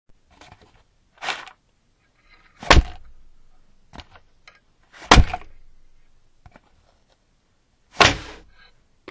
puzzle box with pieces 2
Jigsaw puzzle box full of pieces being dropped onto a table
board-game, box, clunk, drop, jigsaw, puzzle, rattle